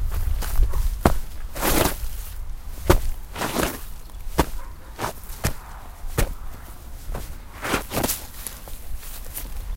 kicking and dragging my feet on a ground bank. distant cars and birds. recorded with a minidisc, stereo electret mic and portable preamp.
competition, drag, earth, kick